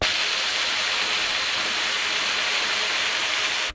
Air blown through a trumpet
experimental, hiss, machine, noise, trumpet